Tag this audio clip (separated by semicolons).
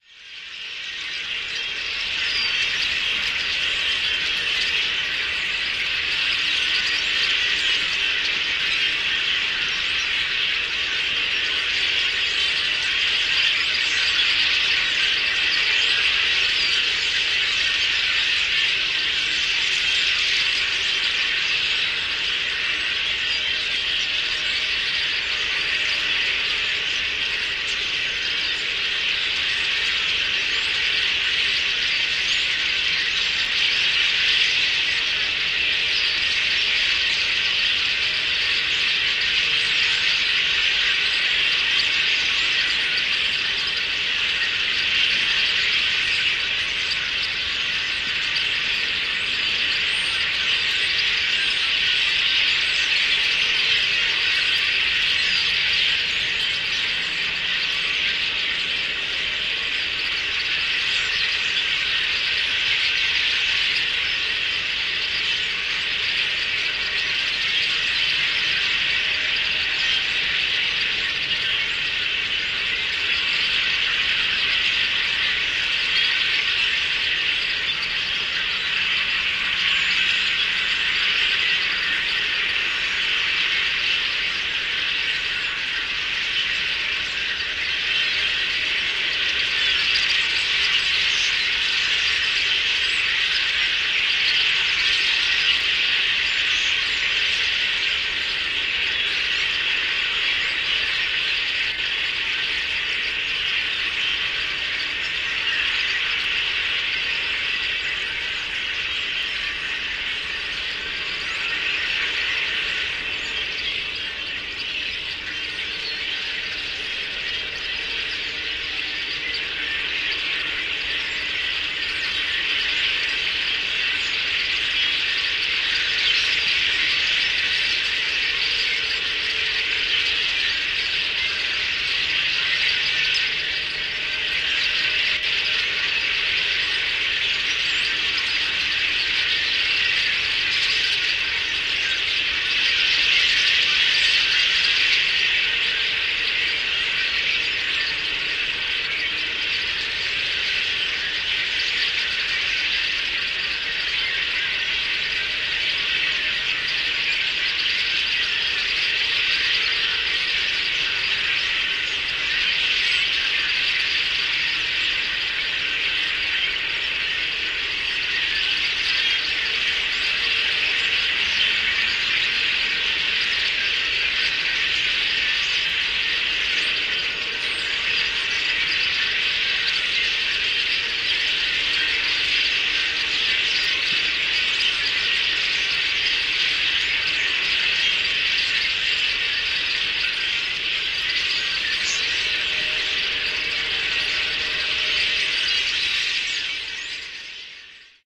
california; blackbirds; sherman-island